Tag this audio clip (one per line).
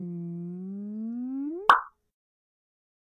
Cortinilla
Sound
Samples